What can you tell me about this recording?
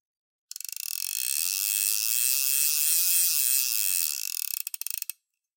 Angel Fly Fish Reel Medium Pull 2
Hardy Angel Fly Fishing Reel pulling line medium speed
clicking, pulling, fishing, turning, winding, fly, retrieve, reel